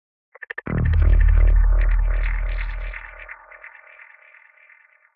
Galactic Fracture FX
Weird sound using Camel Audio Alchemy's granulizer
Sci-Fi, Space, Weird